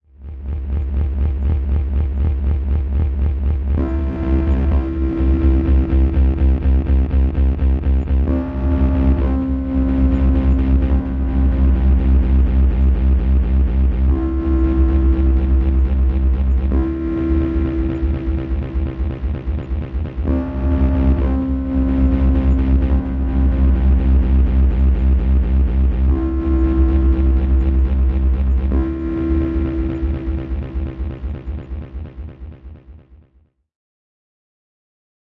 05 Ambience Low Pitch Notes
ambient, low